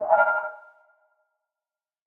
UI 6-4 Confusion blip(TmStrtch,multiprocessing)
Sounding commands, select, actions, alarms, confirmations, etc. Perhaps it will be useful for you. Enjoy it. If it does not bother you, share links to your work where this sound was used.